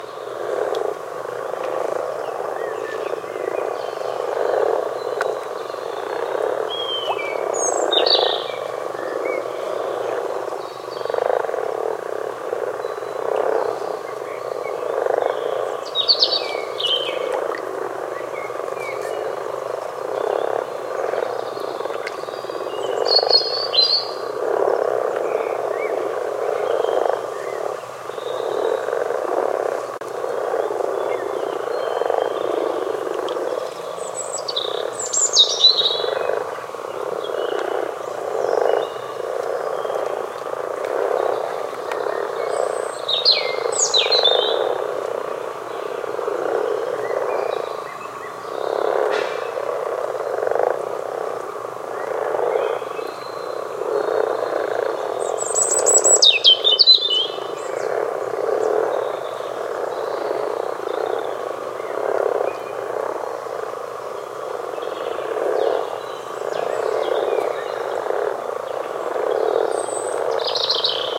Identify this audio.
[Animal] Common Frog - Call - Ribbit - Water - Grasfrosch - Wasser
Animal, Call, Frog, Frosch, Grasfrosch, Ribbit, sound, Wasser, Water